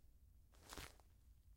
Releasing chair
Someone letting go of a leathery surgery chair.
foley, leathery, release